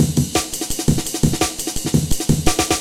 A mangled Amen breakbeat